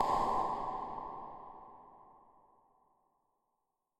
backhand far
Synthetic tennis ball hit, backhand, coming from the opponent.
far, ball, backhand, racket, tennis, hit